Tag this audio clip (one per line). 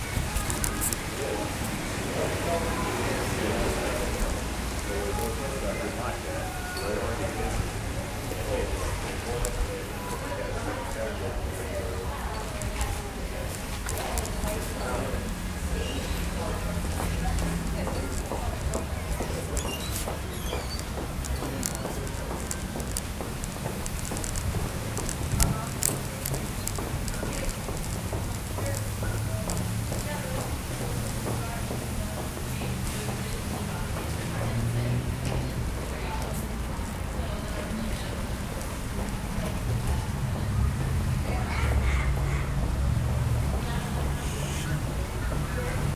ambiance
field-recording